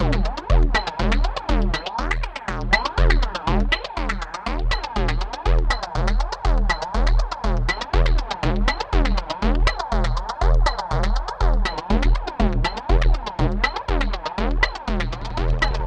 Zero Loop 3 - 120bpm
Percussion, 120bpm, Loop, Distorted, Zero